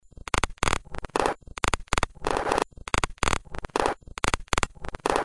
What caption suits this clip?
sound-design created from processing detritus with Adobe Audition
2-bars, ambient, click, electronic, glitch, industrial, loop, noise, processed, rhythmic, sound-design